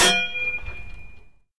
railway crossing bar struck with metal